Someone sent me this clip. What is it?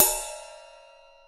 This cymbal was recorded in an old session I found from my time at University. I believe the microphone was a AKG 414. Recorded in a studio environment.
cymbal, perc, percussion, ride